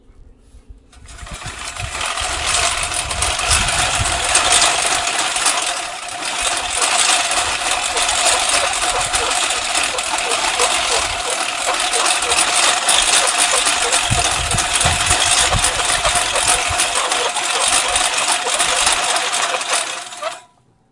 Rotary Lawnmower Long
Rotary blades turning on a manual or push lawn mower through thick grass. Unoiled blades have extra squeak.